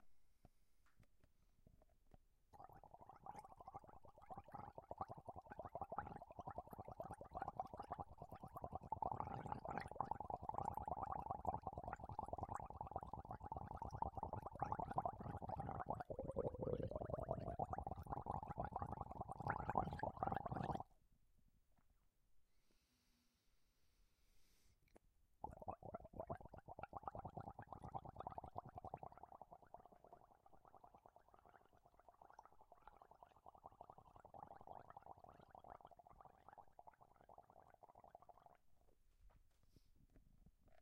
STE-006 throat
sound of gargling water in the throat
taken by zoom h2
gargle, rinse, throat, water